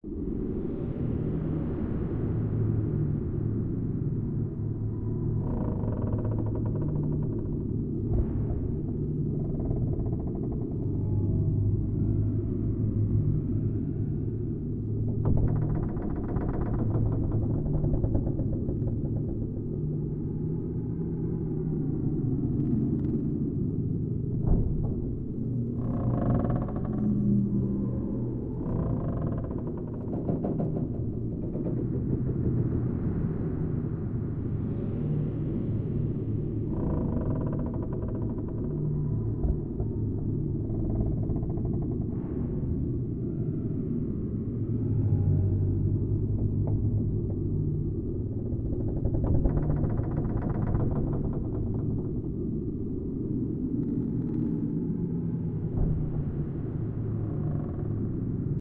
Haunted Ghost Ship
Multi-layered ambience recording done with Yamaha keyboard.
boat, Ghost-ship, haunted, water